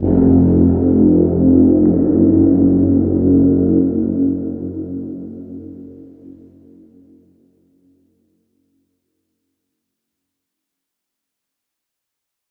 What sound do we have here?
War of the worlds Horn 2
Had a go at making some of the Tripod sounds from war of the worlds , Turned out orite, will be uploading a tutorial soon.
brass, dark, digital, electronic, horn, inception, scary, trombone, tuba, war, worlds, zero